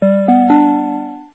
cityrail beep
I recorded the sound used in CityRail stations to queue in an announcements.
queue, beep, chime, synth, cityrail